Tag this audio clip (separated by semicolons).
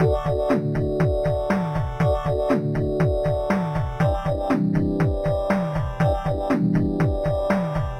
120; 8; 8-bit; 8bit; 8bitmusic; 8-bits; bass; beat; bit; bpm; drum; electro; electronic; free; game; gameboy; gameloop; gamemusic; josepres; loop; loops; mario; music; nintendo; sega; synth